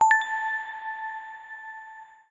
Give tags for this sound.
item; pick-up; object; game; diamond; coin; note

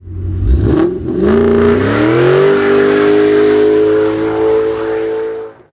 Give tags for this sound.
car; drive; engine; fast; GT500; mkh60; mustang; passing-by; starting; stopping

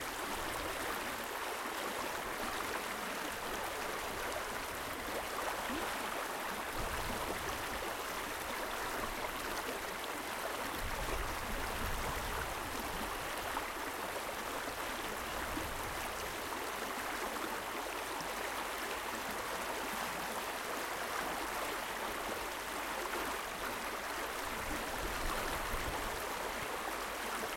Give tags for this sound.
ambience; ambient; field-recording; nature; river; water

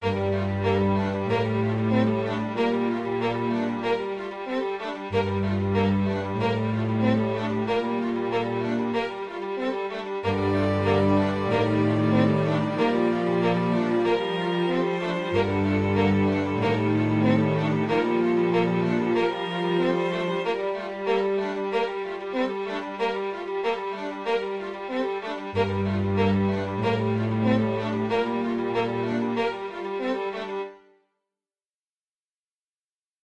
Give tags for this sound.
tension; suspense

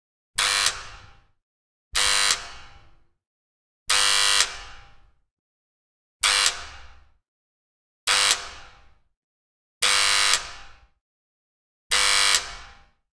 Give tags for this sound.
buzzer; door; short

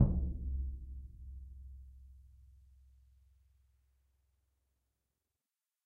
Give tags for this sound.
orchestral; drum; bass; symphonic; concert

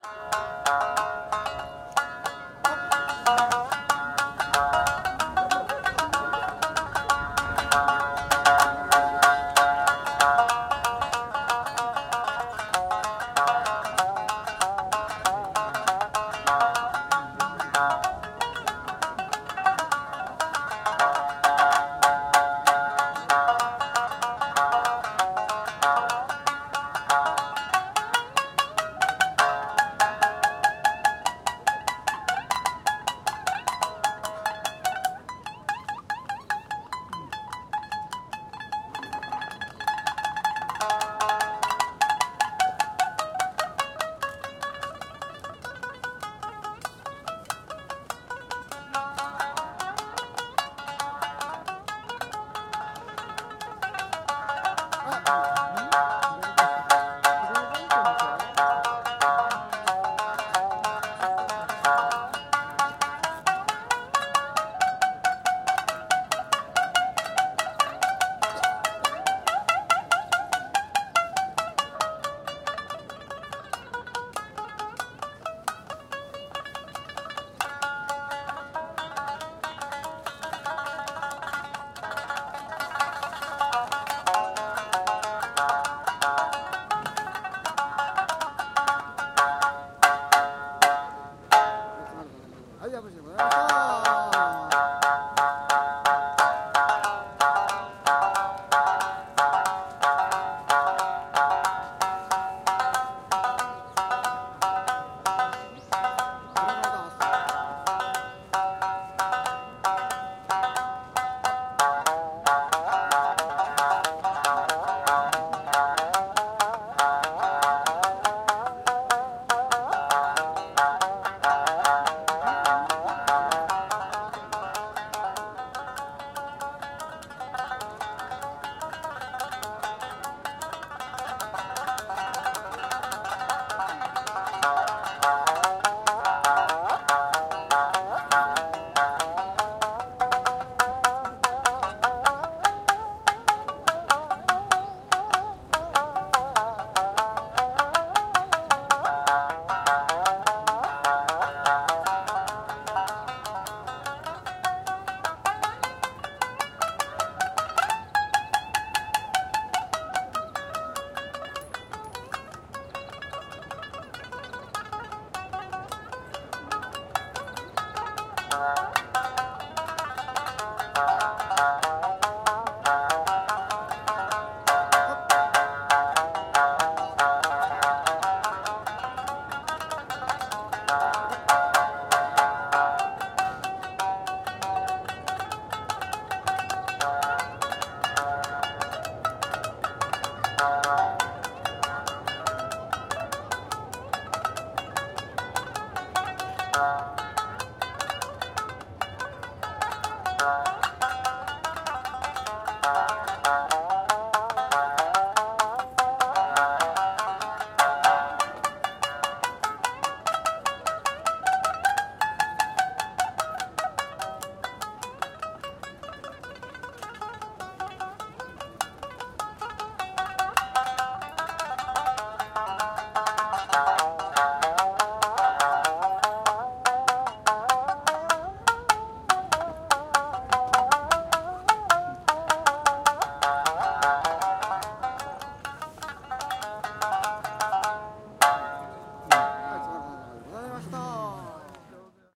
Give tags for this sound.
Asia; blossom; cherry; festival; gotan; instrument; Japan; Japanese; Kiyomizu; koto; kugo; Nippon; park; pluck; sakura; shamisen; street-musician; string; stringed; Taishogoto; Tokyo; traditional; Ueno; zheng; zither

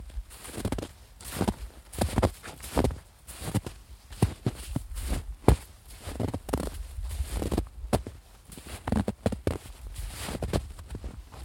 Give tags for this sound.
footsteps; snow; steps; winter

